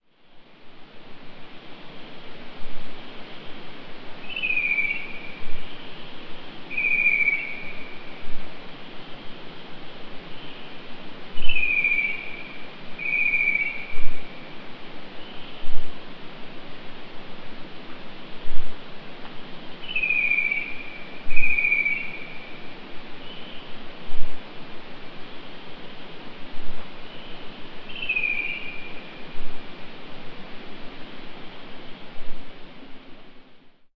Night Bird Atmos
I woke up at 4am to this sound. Thanks to my trusty Zoom H4n i got this. Any birds out there that can identify the call would be appreciated.